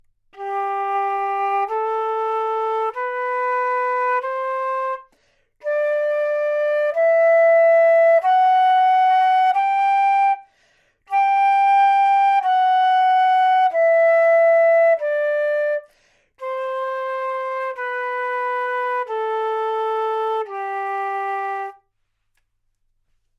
Flute - G major
Part of the Good-sounds dataset of monophonic instrumental sounds.
instrument::flute
note::G
good-sounds-id::6928
mode::major
flute, scale, Gmajor, good-sounds, neumann-U87